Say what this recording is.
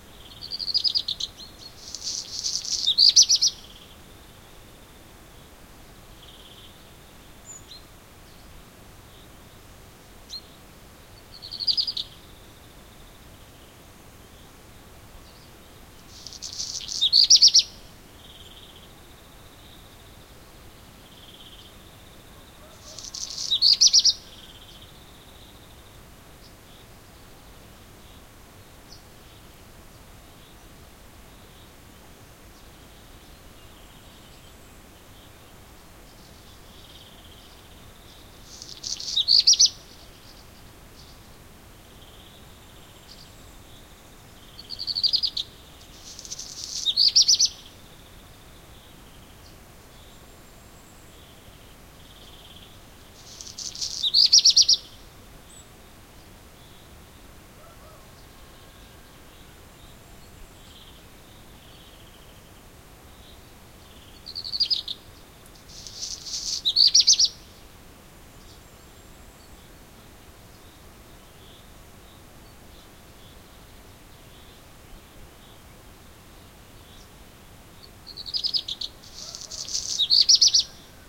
mystery bird no.2
Recorded early in the morning in July 2010 in the Harz Mountains / Germany. FEL preamp, WL-183 microphones from Shure into an LS-10 recorder from Olympus.
phoenicurus-ochruros, field-recording, redstart, harz, black-redstart, birdsong